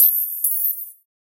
Bright digital GUI/HUD sound effect created for use in video game menus or digital sound application. Created with Xfer Serum in Reaper, using VSTs: Orbit Transient Designer, Parallel Dynamic EQ, Stillwell Bombardier Compressor, and TAL-4 Reverb.
machine, interface, bright, sfx, command, click, windows, digital, application, noise, effect, blip, data, game, hud, pitch, artificial, sound-design, short, bloop, synthesizer, clicks, computer, electronic, gui, serum, synth, automation, bleep